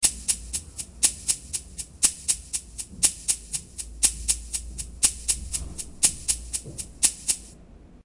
bumbling around with the KC2
electric, kaossilator2, sound